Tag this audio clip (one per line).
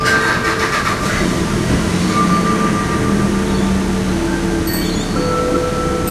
street
car
urban
birds